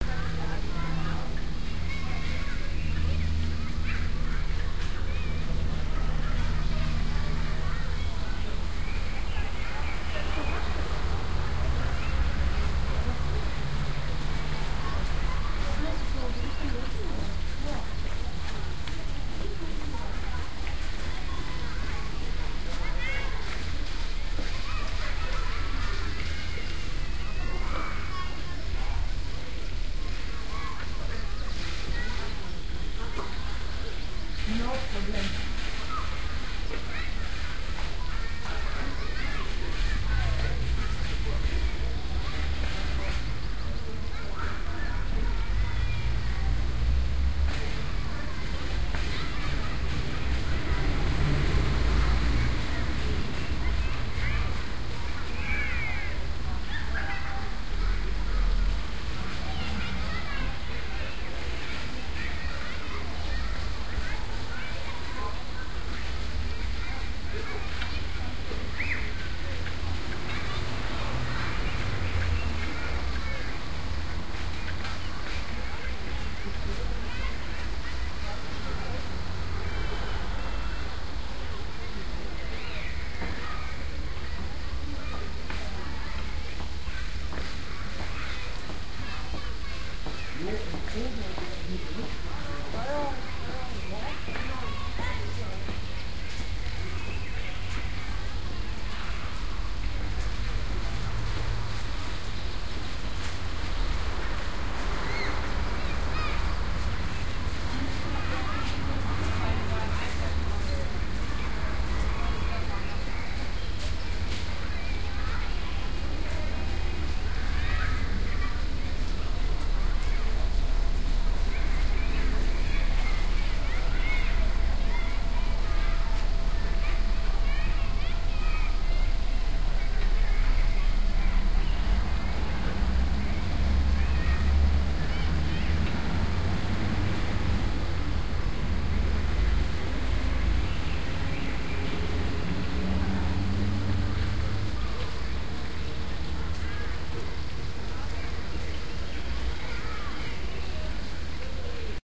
Playground Planten un Blomen
Planten un Blomen is a park with a size of 47 ha directly in the center of Hamburg. The name is low german and means plants and flowers. This track was recorded near a playground, were the kids were playing with some water. Soundman OKM and DR2 recorder.
binaural, field-recording, kids, playground